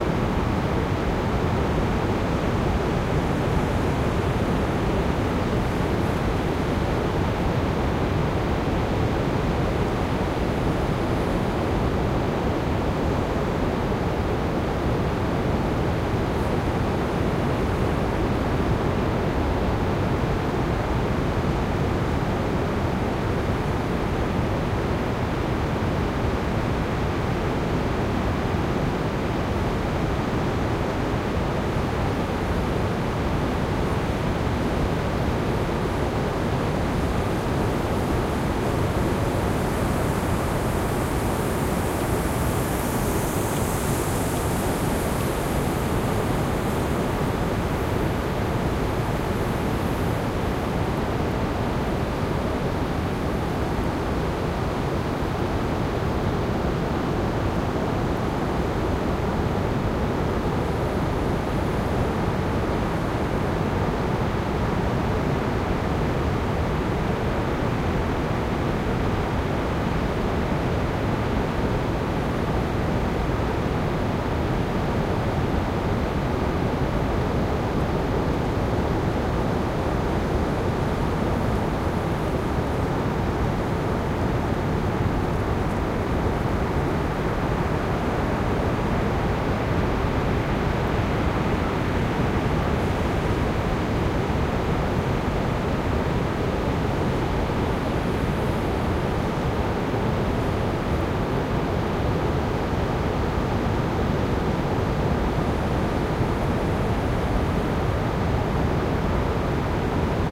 Oregon Coast Ocean
beach, coast, foley, noise, ocean, shore, textures